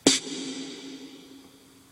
Power Snare 4
A series of mighty, heavy snare hits. Works good with many electronic music subgenres.